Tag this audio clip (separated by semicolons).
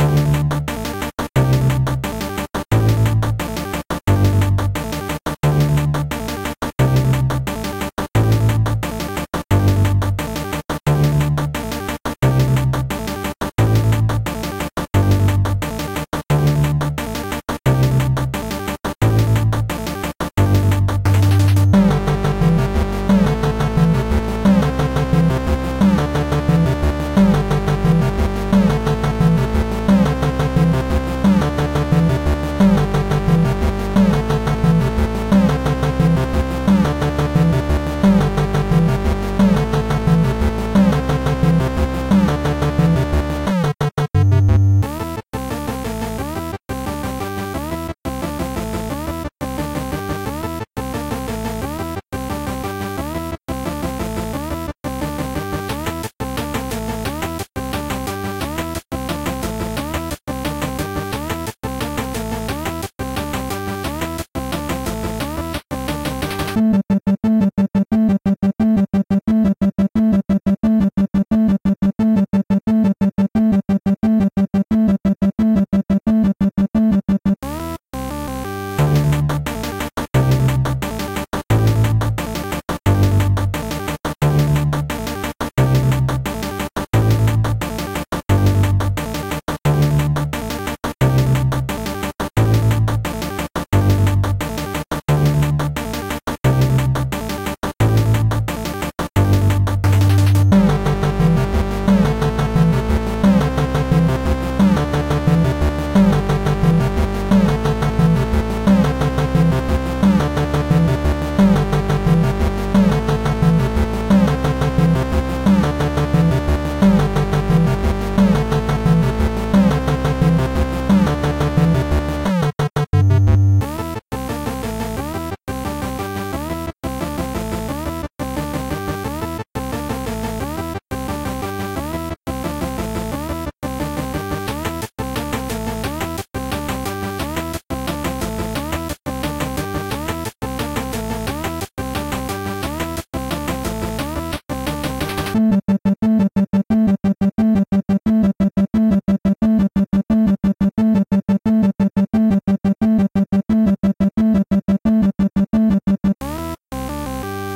game; music; 8bit; chiptune; melody; retro; rhythmic; soundtrack; atmosphere; electronic; background; loop